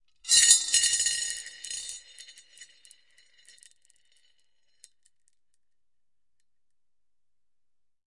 Rolling ten ~13mm marbles around a 33cm diameter ceramic bowl.